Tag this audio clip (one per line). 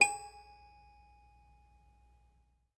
packs
Circus
toy-piano
Piano
sounds
Carnival
Toy